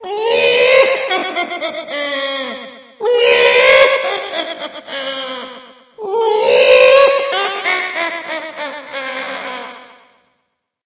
manic laugh
Probably the best insane laugh ever...
laugh, manic